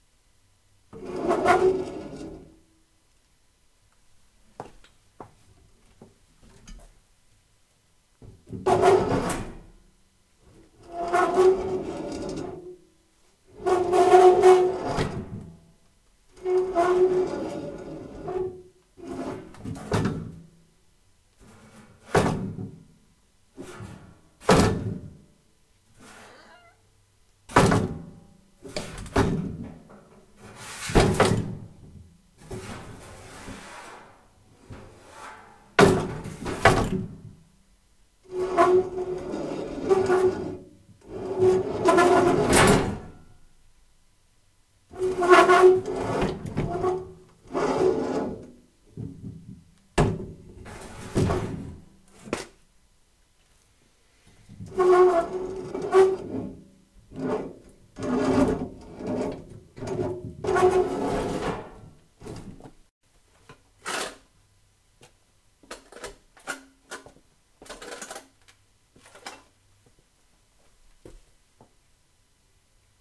Some deep metal scraping and impacts.